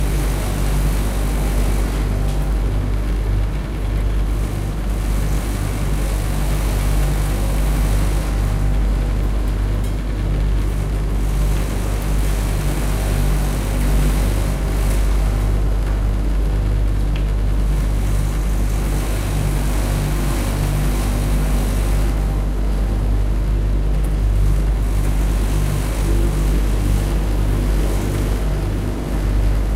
Multiple clothing dryers in a laundromat
This is a recording of several professional gas-heated clothing dryers running in a laundromat. It was recorded from about three feet away near the left end of the dryers operating, near the front-loading doors of the dryers. Heavy, cyclic, mechanical rumbling sound.
April, 2012.
laundromat, clothes-dryer, dryer, clothes, laundry, drier, clothing, launderette